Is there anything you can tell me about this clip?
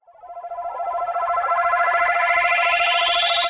d-von request, A UFO Abducting 02, Remastered
Idk why i didn't make a new version, however here it is!
A UFO abducts someone / something!
If you enjoyed the sound, please STAR, COMMENT, SPREAD THE WORD!🗣 It really helps!
More content Otw!
spaceship, ufo, science, abducting, movie, game, cinematic, outlander, film, scifi, fiction, tv, cutscene, alien